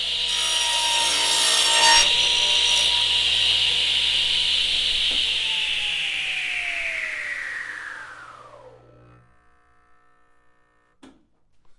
Industrial Saw
Stereo
I captured it during my time at a lumber yard.
Zoom H4N built in microphone.